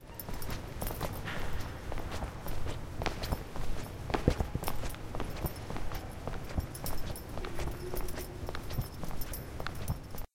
steps in Tanger building
Sound produced by some steps in the hall of Tallers building of campus UPF (Barcelona).
Someone down stairs with a bag in a closed space. You can perceive the sound from zipper better than steps.
campus-upf, steps, UPF-CS12